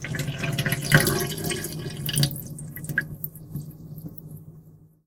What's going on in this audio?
Kitchen Drain
The sound of water running through a Kitchen sink drain.
Recorded with Sony HDR PJ260V then edited with Audacity
draining, dripping, water, running